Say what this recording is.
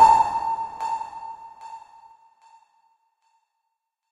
jillys sonar2
Sonar sound made with granulab from a sound from my mangled voices sample pack. Processed with cool edit 96. Added delay...
artificial, echo, environmental-sounds-research, free, granular, sonar, sound